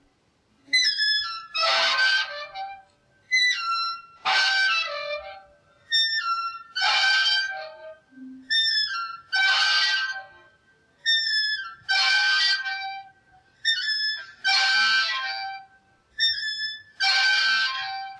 An old seesaw squealing, unedited large clip
long, seesaw, squeal